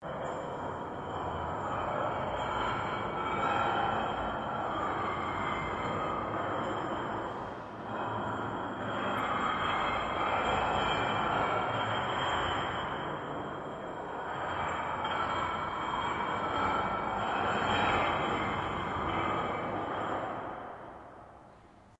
Dragging an scraping 2 bottles on a stone floor in a large empty building resulting in a rather abstract and moving soundscape.